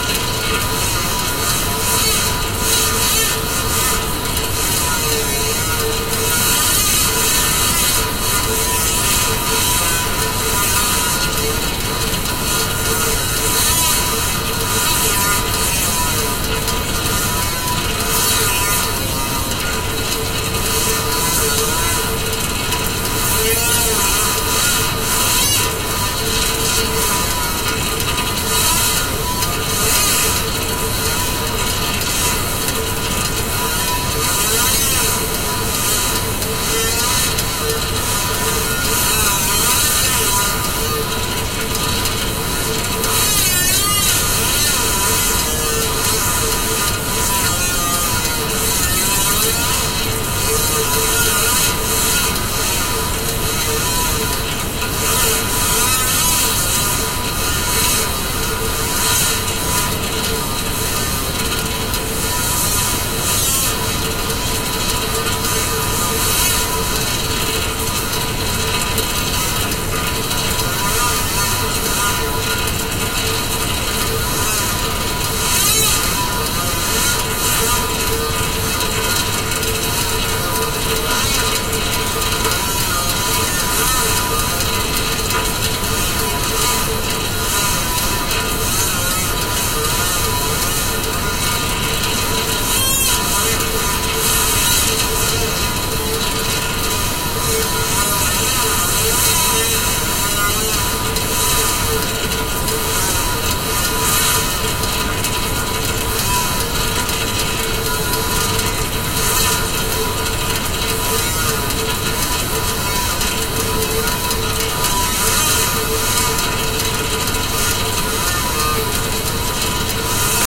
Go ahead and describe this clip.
water on a stove
A before-boiling sound of water in a pot on a stove.
When I first heard it,I took it as a nice guiter sound on a radio somewhere far away.
Recorded with Rode NT-1A,Roland FA101,macbook pro and Tracktion 4.1.8.
file.
boil, water, stove, pan, before-boiling, pot